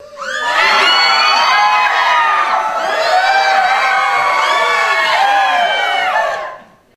Scared Crowd
Recorded with Sony HXR-MC50U Camcorder with an audience of about 40.
afraid, crowd, mob, scared